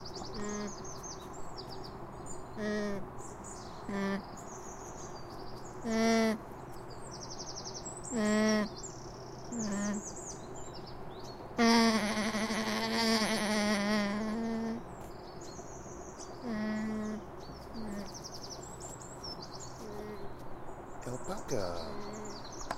Alpacca makes a noise whilst scratching his throat
Just a very quick recording of a local Alpaca. Whilst I was recording he repeatedly made this coo'ing type of noise and then scratched himself which made his throat stutter.
alpaca, animal, daytime, field-recording, outside, scotland, weird